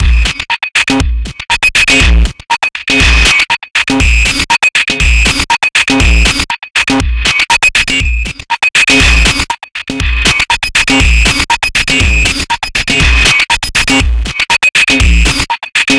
DestructoBreak2 LC 120bpm
breakbeat, distorted